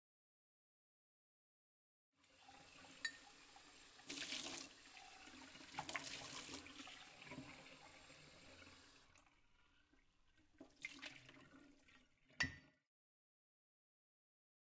Washing the pub glasses by water.
Czech, Panska, PanskaCZ